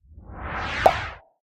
Whoosh-Pop?
A whoosh and pop suitable for a reveal or transition.